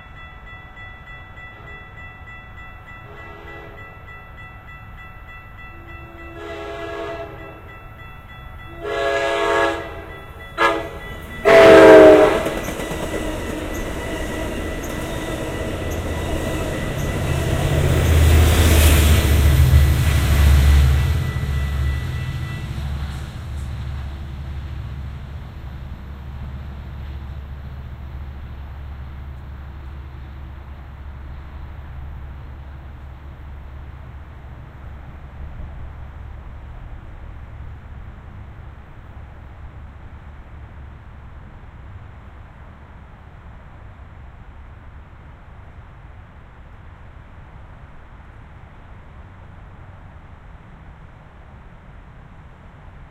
Amtrak Pacific Surfliner Pass-by
(RECORDER: ZoomH4nPro 2018)
(MICROPHONES: Binaural Roland CS-10EM In-Ear Monitors)
As these are recorded using binaural in-ear mics, I purposefully don't turn my head to keep the sound clean and coming from the same direction.
The Amtrak Pacific Surfliner winds it'd way up and down the Pacific Coast coast. This train is known for spectacular coastal views.
Here you can hear this high-speed train come screaming through Burbank's downtown station (traffic can be heard on I-5 in the distance)
You will hear a security guard walk behind me JUST before the train comes through. He was one of our trusty transpo officers making sure I wasn't up to no good with my ZoomH4N device. Thanks for keeping us all safe officers!
The train makes a pass from RIGHT to LEFT and I kept recording so you would have some room-tone at the tail.
amtrak, back-ground, locomotive, general-sounds, general-noise, surroundings, experience, ambiance, rail-road, ambient